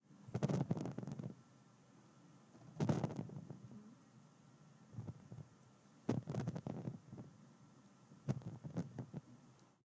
Recorded on Zoom H4n. Up close recording of a candle flickering in the breeze.